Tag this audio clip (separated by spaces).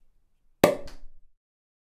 OWI cork-pop cork sfx sound-effect Champagne pop